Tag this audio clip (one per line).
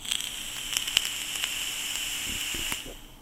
vape
sizzle
vaporizer